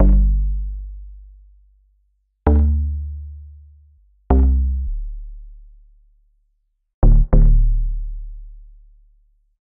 Bass guitar loops and synths